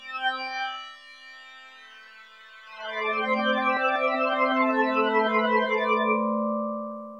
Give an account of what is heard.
Mind Ambient 14
This is the Nord Lead 2, It's my new baby synth, other than the Micron this thing Spits out mad B.O.C. and Cex like strings and tones, these are some MIDI rythms made in FL 8 Beta.
ambient, backdrop, background, electro, glitch, idm, melody, nord, rythm, soundscape